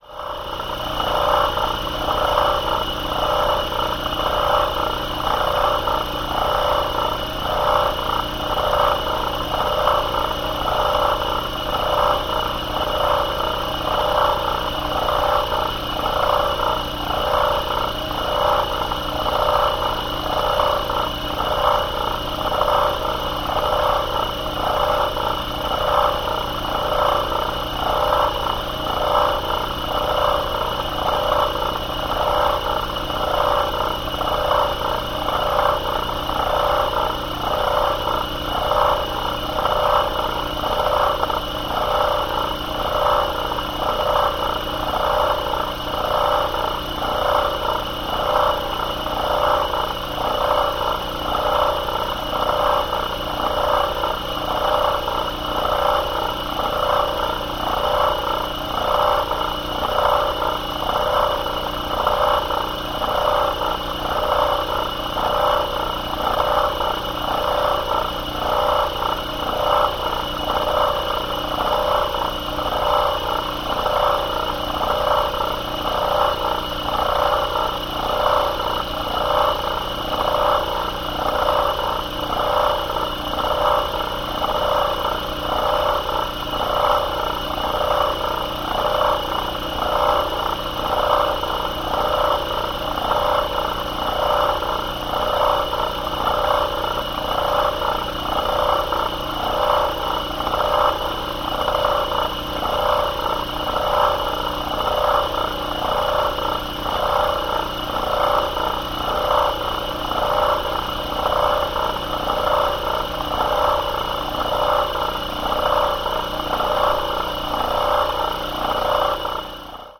Agilent Tri-Scroll Vacuum Pump Cowling
Contact microphone recording of an Agilent Tri-Scroll vacuum pump made with the microphone on the cooling cowling